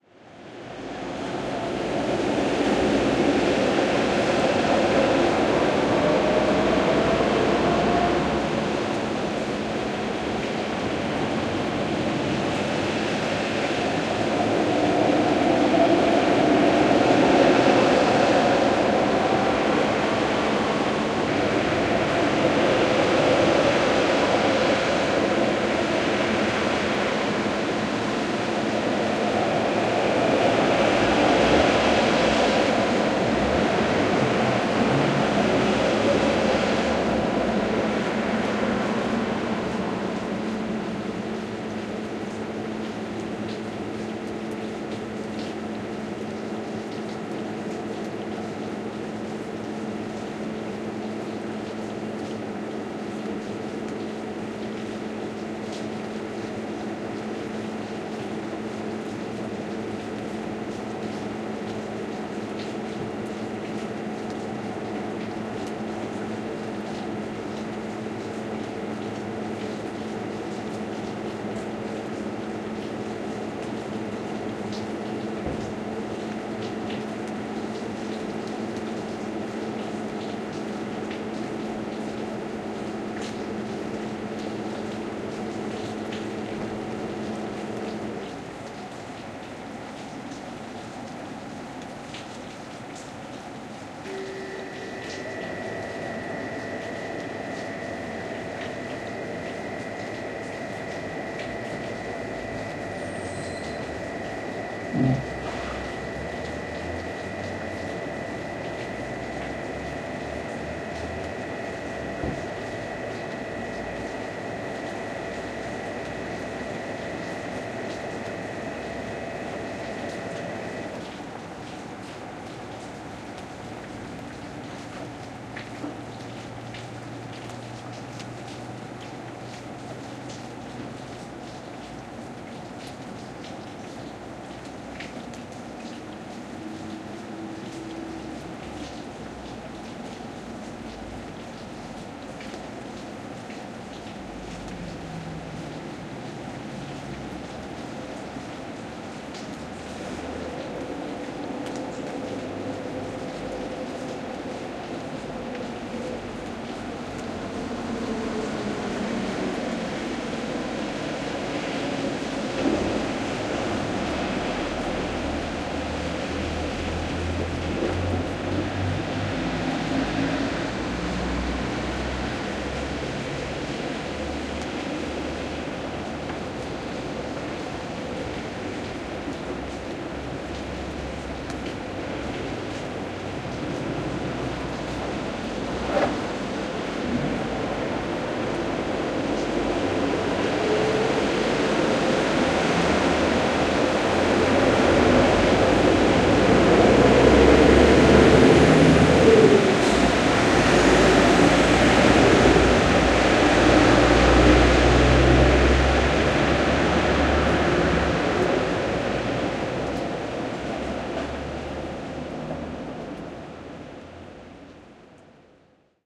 17.09.2015 crossroads 92 and 138 rainy ambience
17.09.2015: around 21.00. The national road no. 92 and the vovoidship road no. 138 in Torzym (Poland). In front of Zajazd Chrobry Motel and Restaurand. Sounds od the road and parking place.